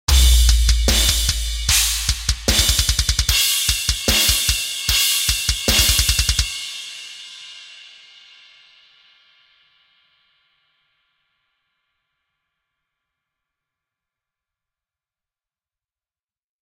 Death Metal Drums 1
brutal, core, cymbal, death, drums, metal, slam, snare